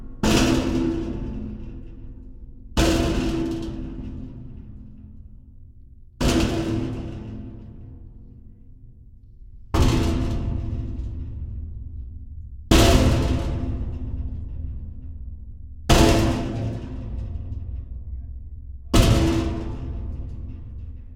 TH SFX - Rattling metal hits
Playing with a noisy metal shelf to get a bunch of different sounds. Recorded with a Rode NTG3 shotgun mic into a Zoom F8 field recorder.
Rode-NTG3, Explosion, metal-grinding, Hit, impact, Zoom-F8